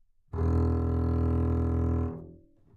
Double Bass - E1
Part of the Good-sounds dataset of monophonic instrumental sounds.
instrument::double bass
note::E
octave::1
midi note::28
good-sounds-id::8593